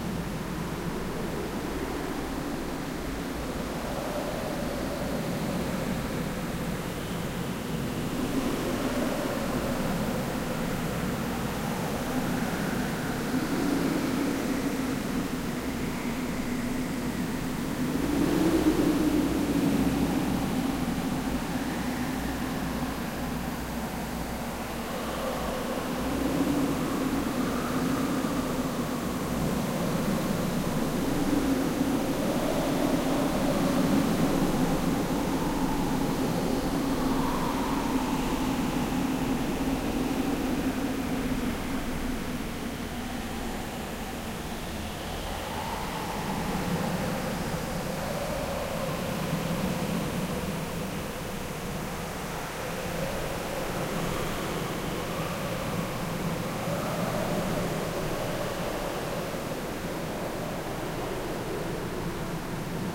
Sound created for the Earth+Wind+Fire+Water contest
Generated with Crystal vsti and Reaktor "Space drone"
basically 3 noise waveforms layered with a bandpass filter
Delayed, panned, reverberated
it simulates enough realistically a windy outdoor atmosphere